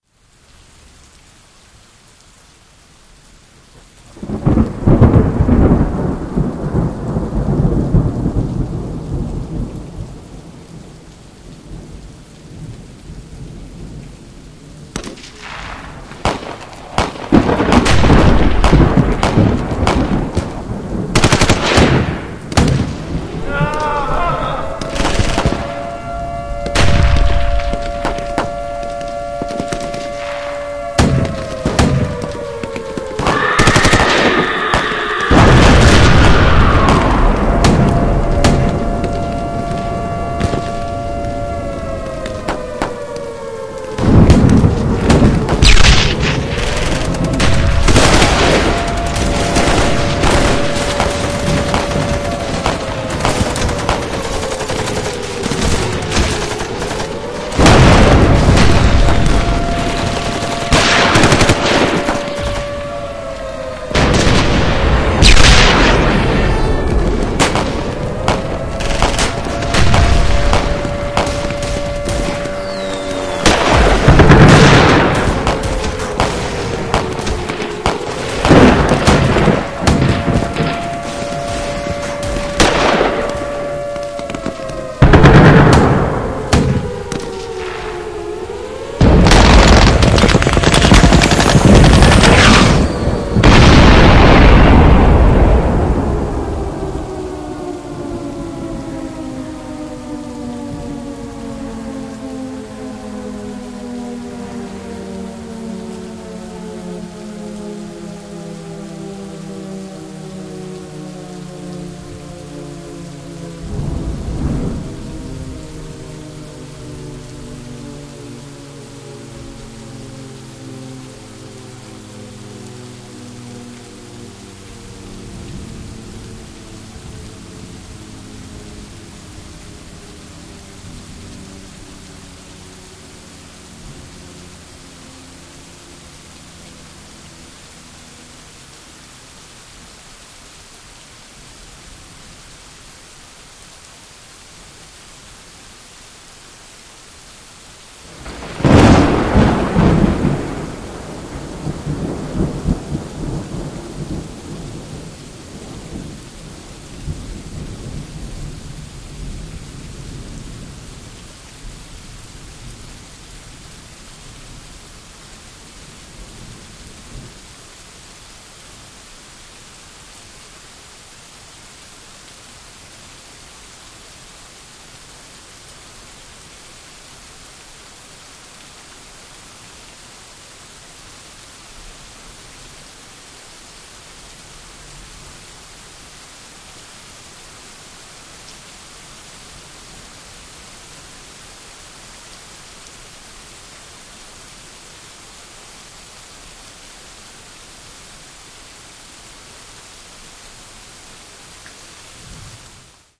I used Audacity to put this together for a school project. This piece is a little lengthy and the last minute to minute and a half are not really needed, just for a transition.
I would like to give thanks for all the people who uploaded their great sounds, I hope people find my battlefield sounds useful in many ways.
Special thanks to: RHumphries, guitarguy1985, alienbomb, Bram, cam.dudes and Omar Alvarado especially.
Thanks
air, airstrike, background, Battle, bomb, day, death, die, fight, gun, guns, high, kill, long, night, quality, rain, raining, screaming, shoot, shooting, siren, sound, sounds, storm, strike, thunder, war